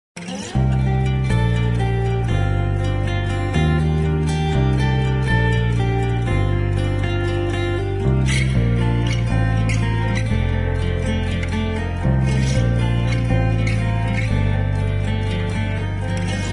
i cut this loop from my own song. it recorded with a cheap behringer mic. but it sounds good, enjoy it!